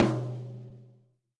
Toms and kicks recorded in stereo from a variety of kits.
acoustic, drums, stereo